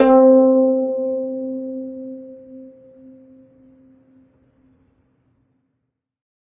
single string plucked medium-loud with finger, allowed to decay. this is string 15 of 23, pitch C4 (262 Hz).